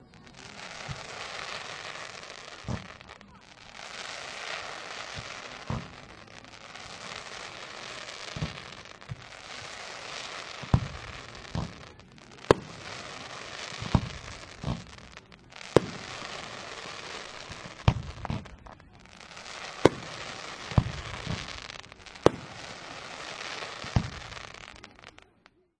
fireworks impact19
Various explosion sounds recorded during a bastille day pyrotechnic show in Britanny. Blasts, sparkles and crowd reactions. Recorded with an h2n in M/S stereo mode.
blasts, bombs, crowd, display-pyrotechnics, explosions, explosives, field-recording, fireworks, pyrotechnics, show